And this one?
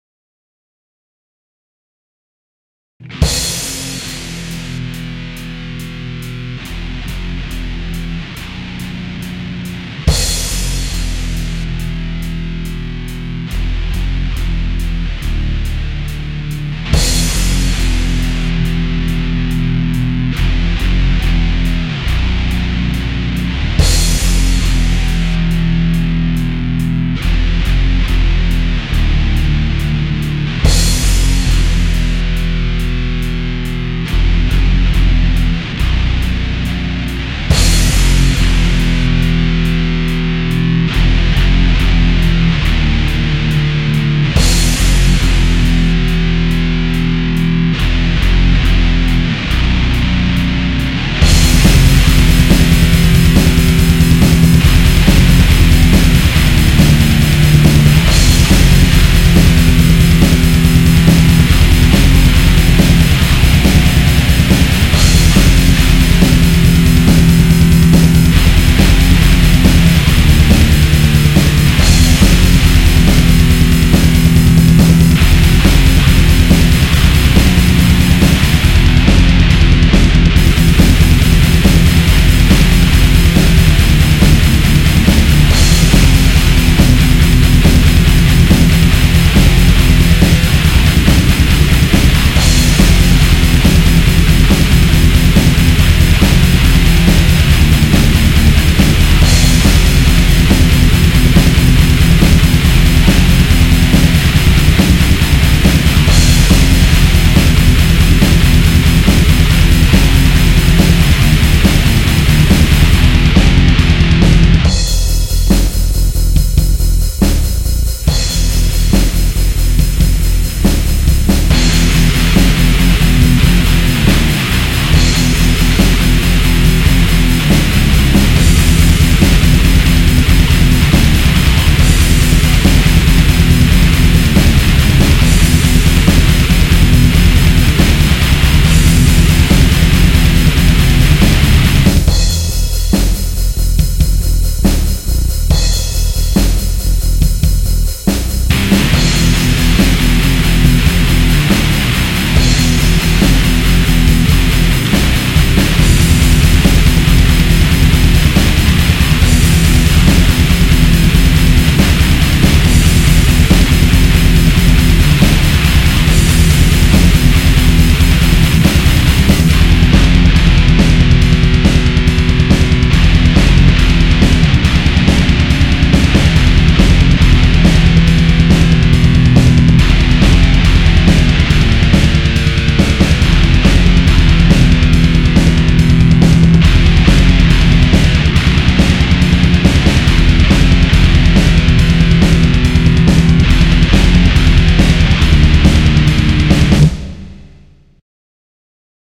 a short crapy death metal track i recorded in drop A tuning.
Have fun
groove, metal, vocals